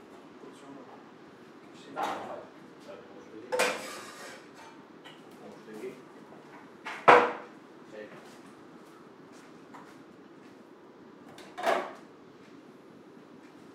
FX - manipular objetos de cocina 6
food, kitchen